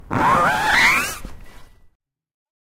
Big Zipper 2
Scraping a plastic canvas with fingernail from left to right. This is one of those grill covers you can protect your grill with. Recorded outside, close up with TASCAM DR-05 internals, cropped in Audacity.
canvas, flyby, whoosh, close-up, field-recording, zip, foley, scrape